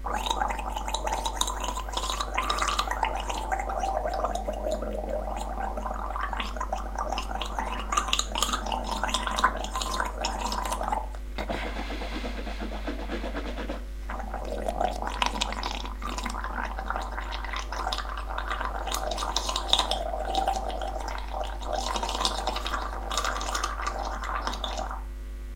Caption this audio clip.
I have to regularly gargle some horrible mouthwash as I've just had my Adenoids removed. Sony ECM-DS70P Mic to a Sony minidisc MZ-N710 acting as a preamp into my Edirol UA-25 audio interface.